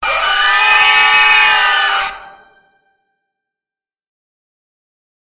Scream Grind Chord C4 E4 Ab4 D5
A mix of two synth voices using the chord C add 9 sharp 5 to emphasize its impact.
Scary, Scream, Horror, Grind